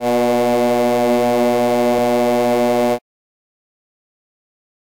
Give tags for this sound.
ship; ferry; storm; fog; horn; foghorn; honk

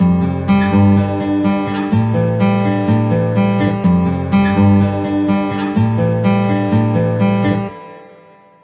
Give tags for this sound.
125; acoustic; bpm; guitar

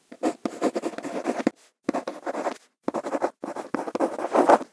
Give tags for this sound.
stereo; foley; pencil

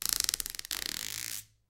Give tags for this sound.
bag; plastic; squeak

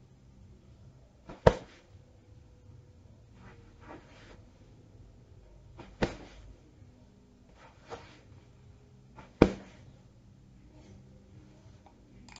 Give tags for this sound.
fight; impact; pillowfight

